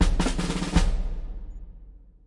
Bass drum snare roll victorious victory positive percussion
drum, positive, roll, Bass, victory, percussion, snare, victorious